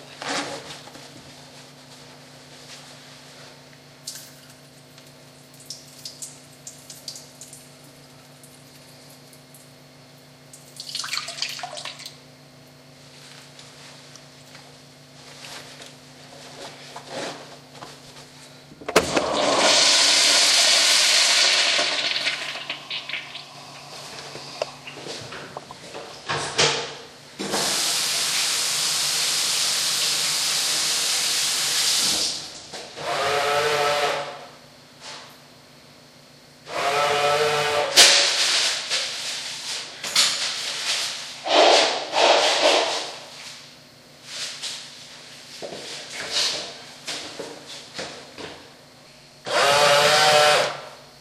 Some files were normalized and some have bass frequencies rolled off due to abnormal wind noise.